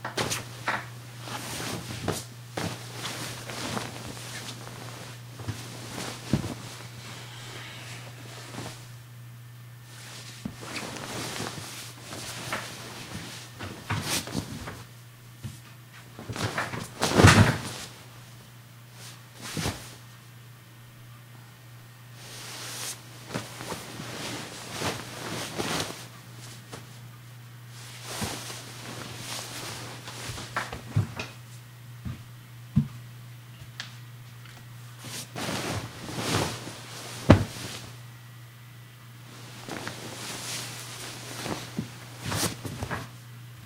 Foley, getting in and out of bed, sheets, fabric rustle
Getting in and out of bed, sheet and bed movement with rustles
waking, up, wake, bed, sheets, foley